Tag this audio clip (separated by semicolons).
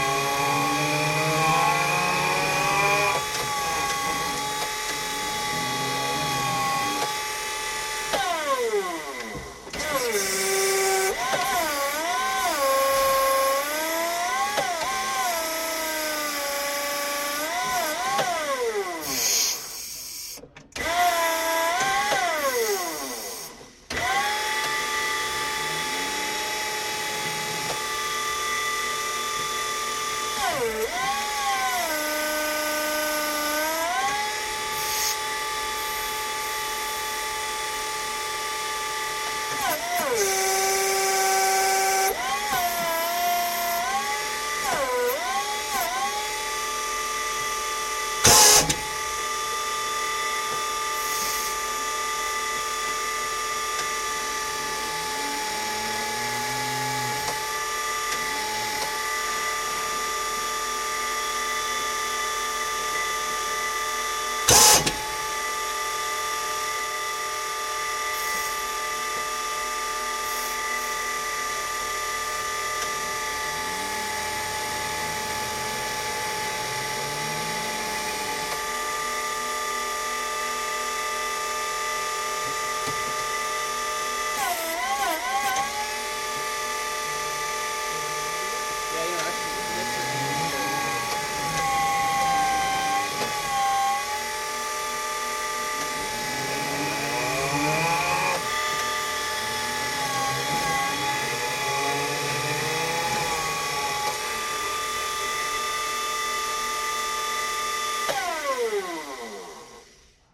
dry; hydraulic; forklift; close; lav; maneuvering